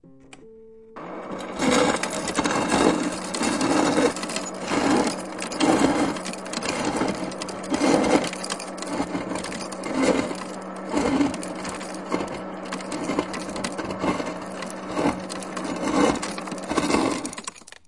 water - ice - glass cup - filling with crushed ice from refrigerator 01
Filling a glass cup with ice from a refrigerator.